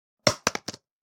An earbud canister drop on a cardboard laid on the floor.